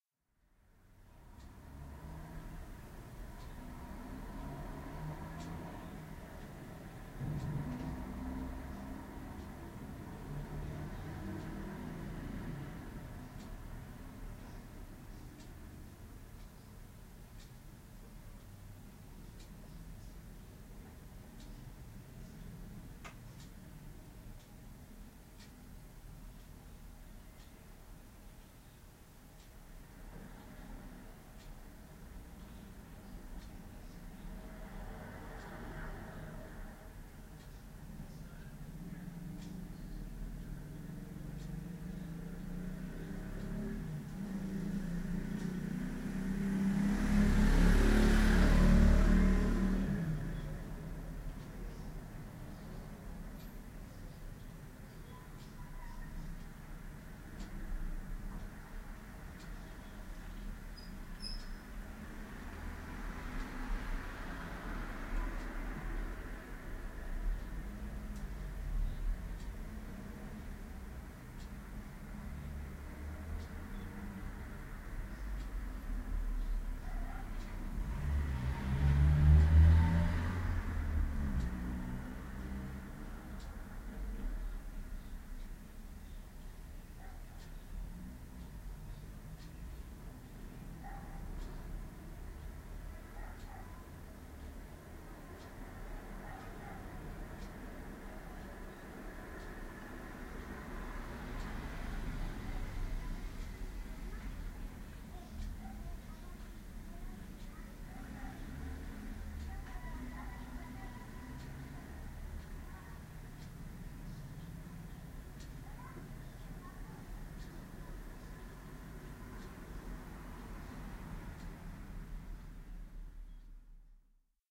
Room Ambience
Ambience, Bedroom, Indoors, Room